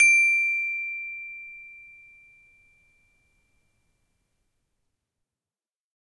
children instrument toy xylophone